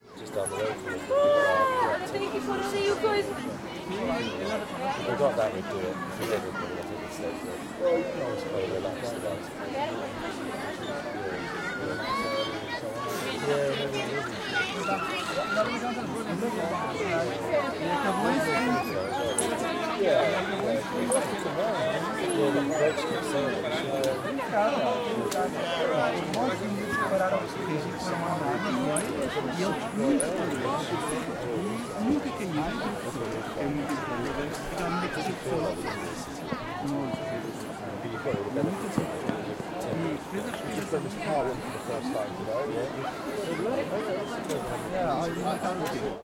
Playground Milton Park 2
Recording of loads of children and adults on a a playground.
Location: Milton Park, Cambridge, UK
Equipment used: Zoom H4 recorder
Date: 24/09/15
playground, children, talking, play, adults, kids